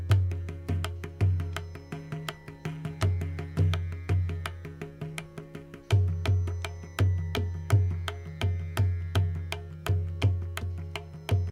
A short jati (phrase) played on the Mridangam, a percussion instrument used in Carnatic Music of India. It is a part of a long Mridangam solo. The Mridangam is tuned to C#.